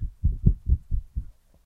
Low frequency thumps
brush, hits, objects, random, scrapes, taps, thumps, variable